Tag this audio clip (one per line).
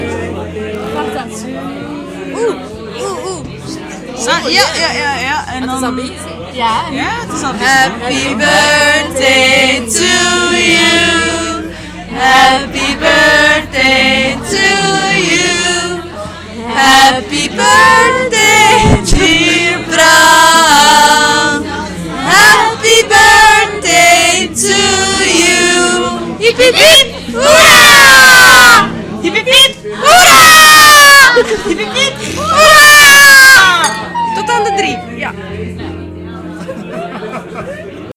birthday
chant